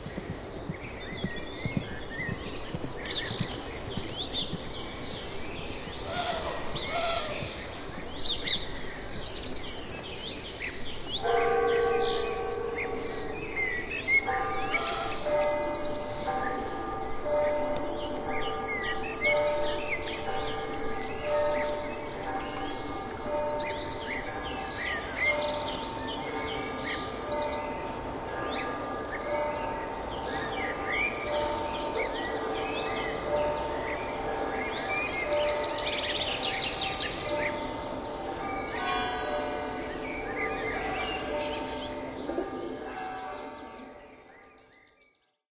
This is a short clip that can be used to establish a setting for a small old style village.
village, town, setting, design, background, foley, sound, theater